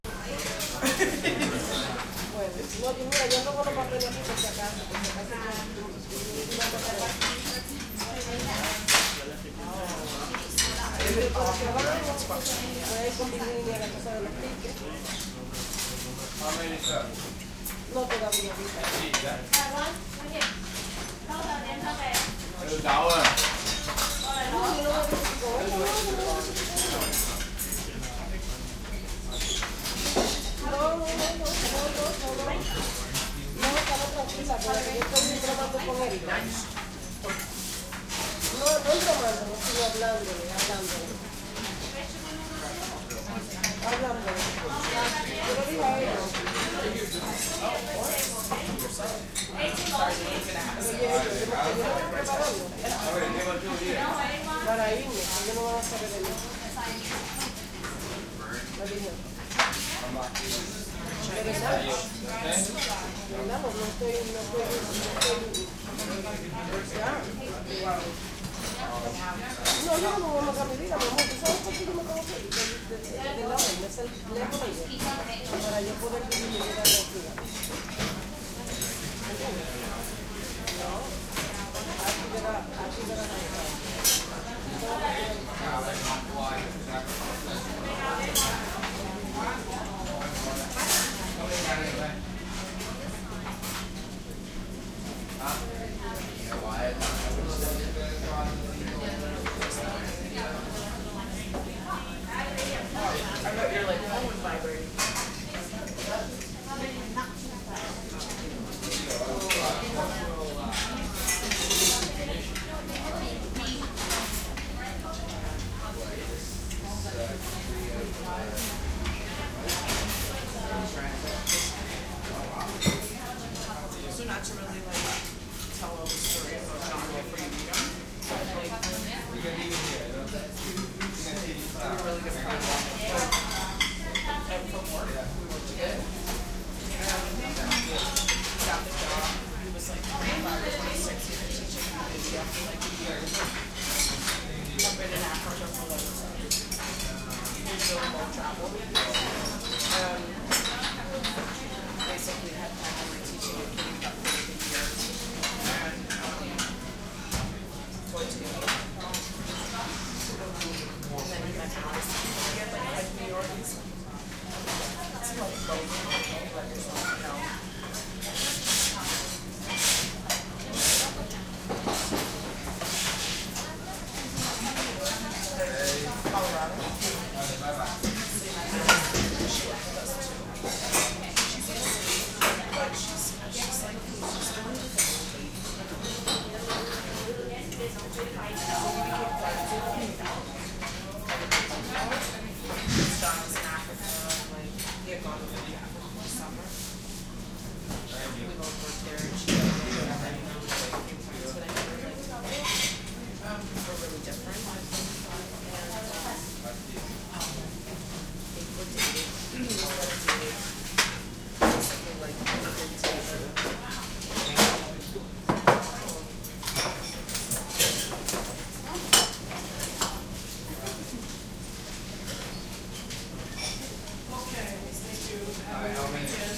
crowd int small light walla chinese restaurant2 Montreal, Canada
int, walla, light, crowd, small, chinese, restaurant